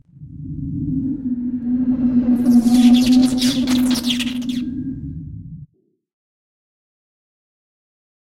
Portal3 Elongated
Part of a series of portal sound effects created for a radio theater fantasy series. This one is a straight-forward mechanism sound with some "sparks".
transporter, mechanism, sci-fi, portal, fantasy, sparks